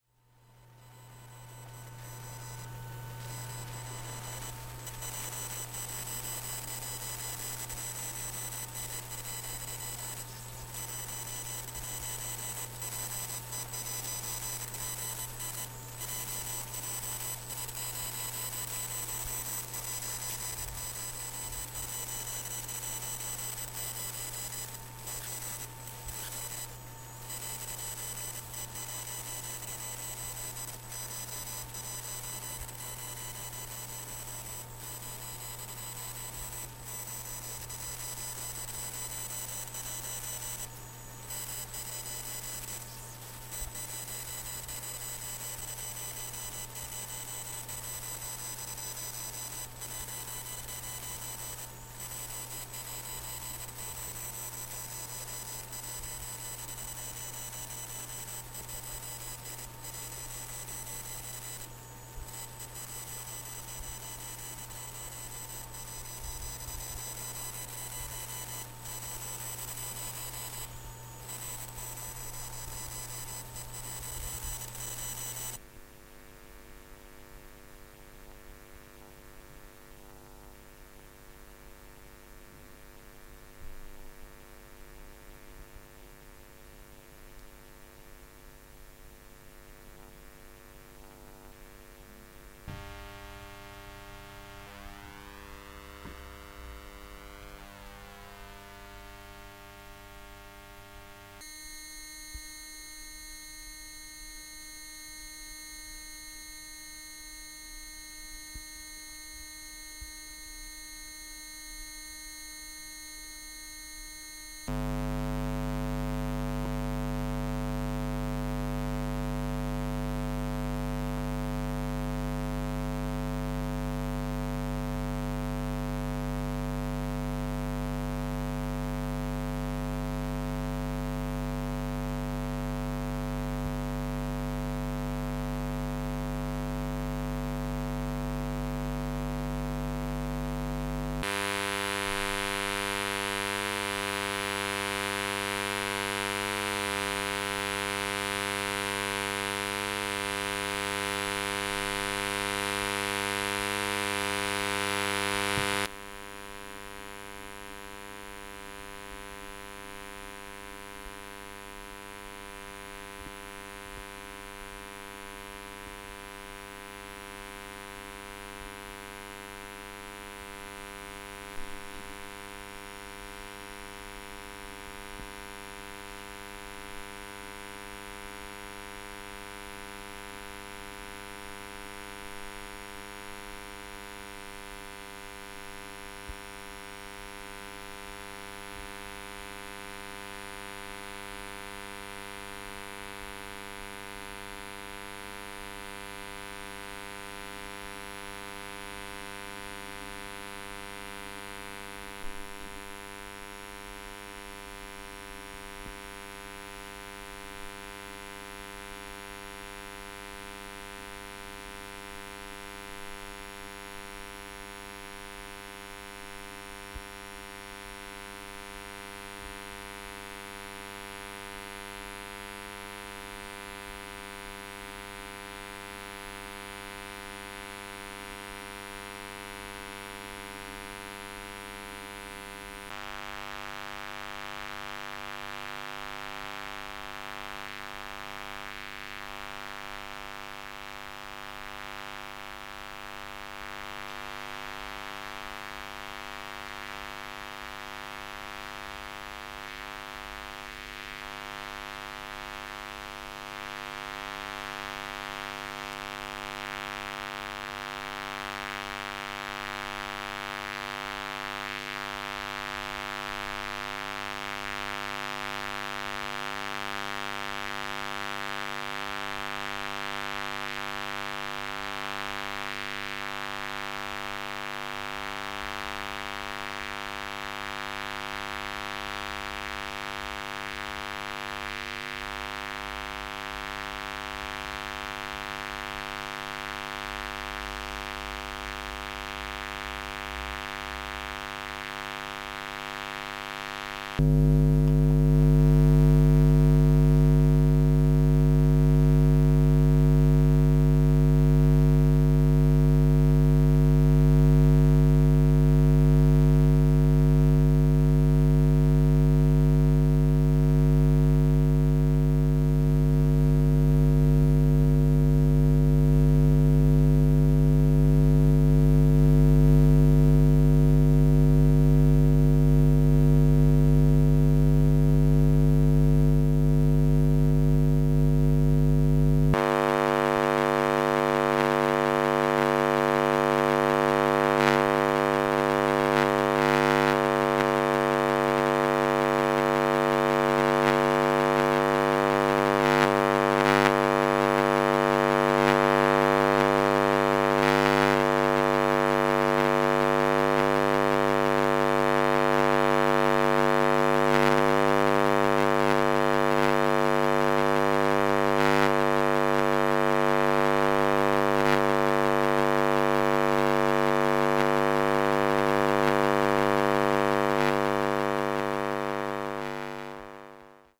buzzes and noises 17.03.2016
Recording made on 17.03.2016 in Leszno by Darek Kaźmierczak as a part of the field exercise during audioreportage workhop conduced by Wanda Wasilewska from Polskie Radio Merkury. Workshop organized by the Department of Ethnology and Cultural Anthropology at AMU in Poznań (Moving Modernizations project founded by NCN). Recording consist of buzzes and noises of power supplies, sockets, cables hiddend in walls.